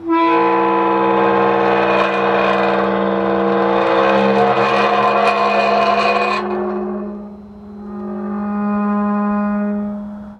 Heavy wrought-iron cemetery gate opening. Short sample of the shivery rattling groaning sound of the hinges as the gate is moved. Field recording which has been processed (trimmed and normalized).